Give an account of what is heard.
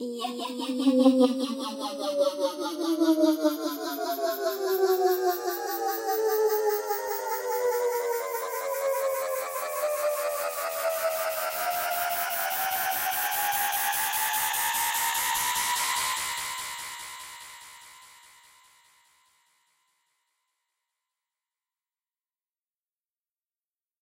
Noisy Riser
Two octave riser in key of C made with granular synthesis from samples I got off this website :)
build, drop, house, suspense, tension, trance